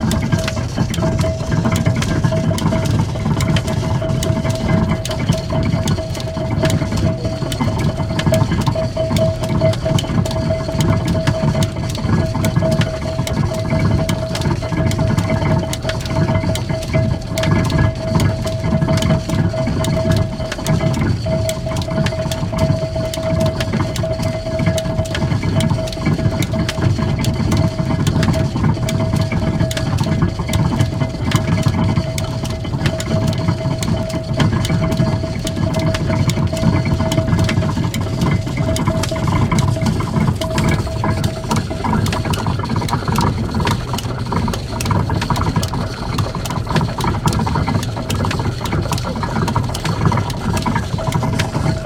SE MACHINES MILL's mechanism 06 - different mic position
One of the machines in watermill.
rec equipment - MKH 416, Tascam DR-680
factory, industrial, machine, machinery, mill